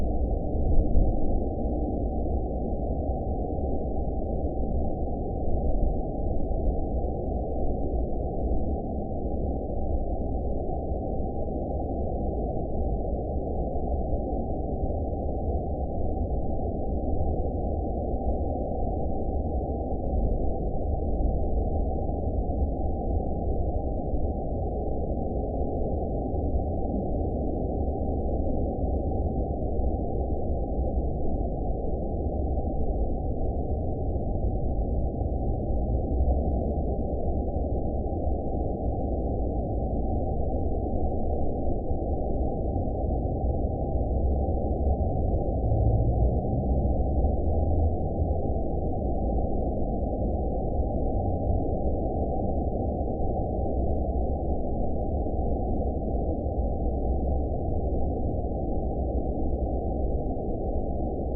dark ambient underwater deep 3
ambient, dark, deep, fantasy, processed, scifi, underwater